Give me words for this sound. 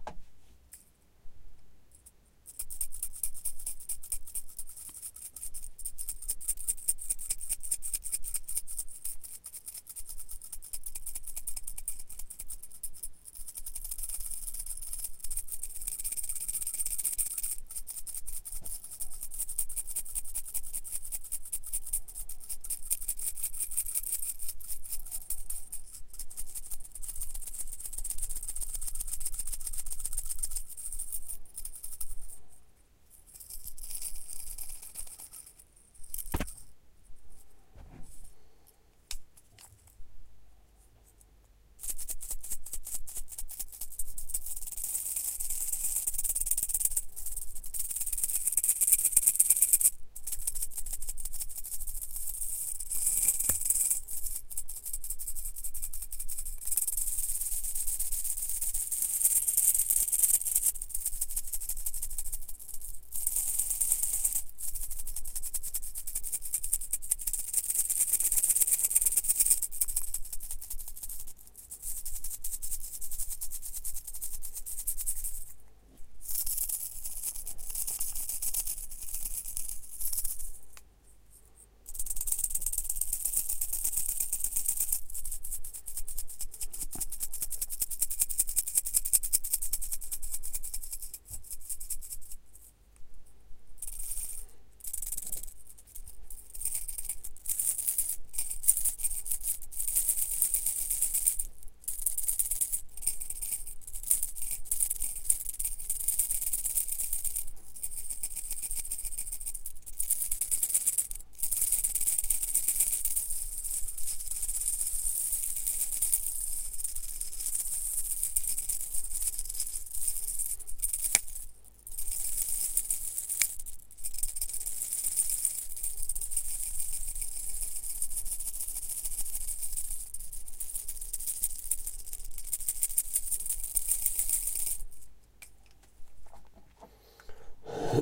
Mechanical pencil mines. Recorded with Behringer C4 and Focusrite Scarlett 2i2.
sound, mechanical, mines, pencil